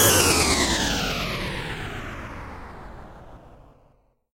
This sound is created from noise recorded by IC recorder and apply Paulstretch in Audacity:
Stretch factors: 1,1
Time resolution (seconds): 0,05